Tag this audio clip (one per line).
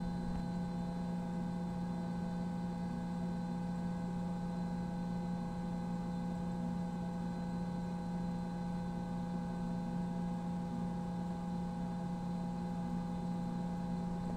Buzz
Drone
Electrical
Hum
Mechanical